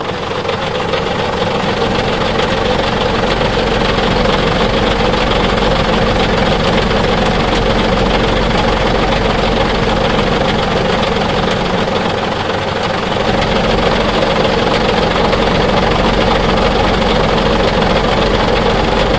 engine close
I stuck my Zoom H4n under the hood of a dumpster truck while they were away... this one's also good for pitching a lot...
close, diesel, engine, real